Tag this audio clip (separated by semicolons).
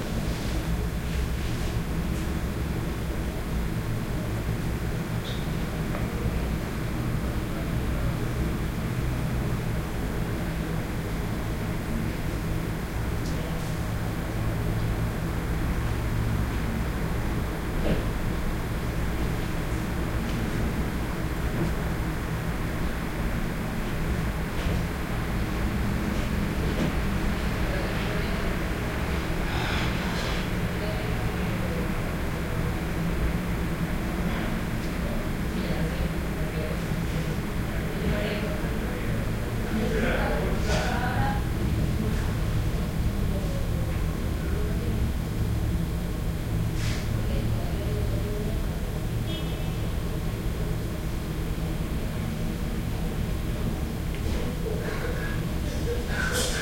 small; stereo; ambient; indoors; room